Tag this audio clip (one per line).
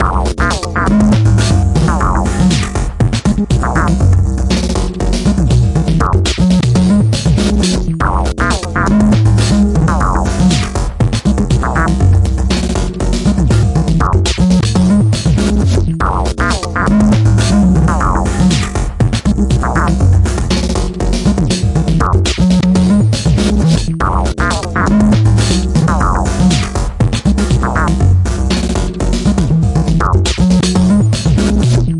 abstract
broken
chaotic
crazy
drum
futuristic
glitch
loop
rhythm
rhythmic
scratch
sound